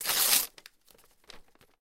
tearing paper 10
This is a sample from my sample pack "tearing a piece of paper".
book, break, breaking, destroy, field-recording, foley, journal, magazine, newspaper, noise, paper, stereo, tear, tearing, tearing-apart, tearing-paper